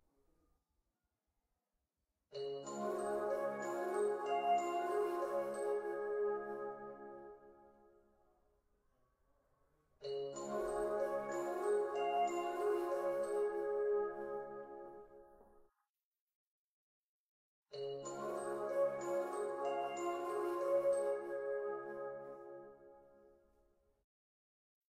sonicsnaps-LBFR-schoolbell
Class ring of secondary school La Binquenais. The class ring is three times the same tone.
recorded on Monday 12th November 2012 with Roland R05
binquenais, bretagne, brittany, field-recording, rennes, school